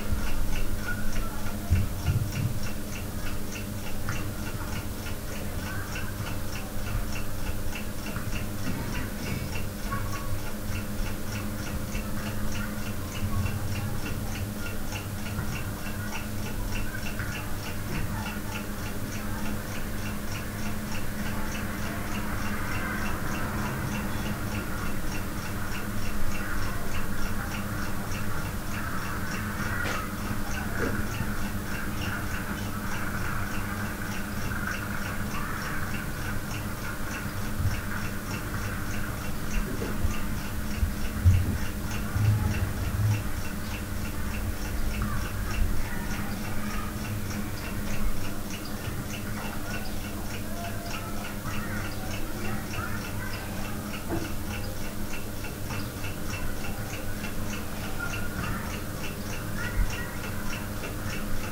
indoors ambient room tone clock ticking distant TV
distant, tv, indoors, tone, ticking, clock, ambient, room